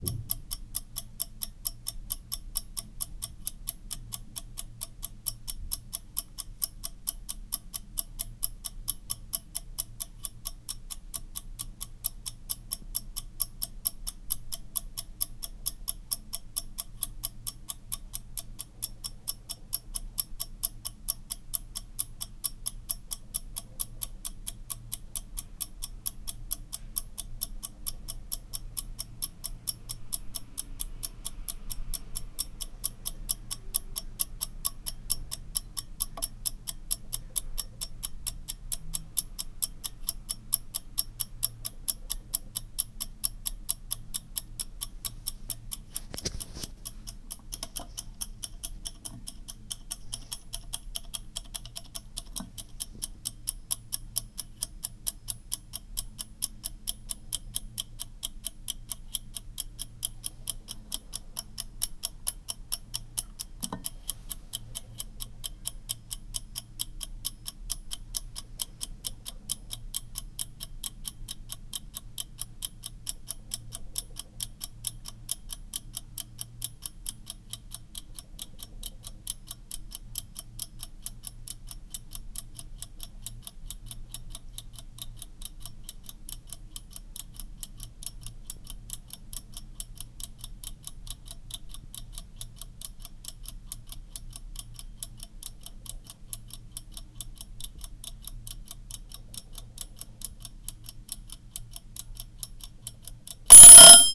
Long recording of ticking, loud buzzer.